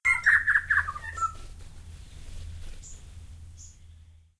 Tui Burst
A lovely outburst of tui song
Aotearoa, Birds, New, Tropical, Tui, Tuis, Zealand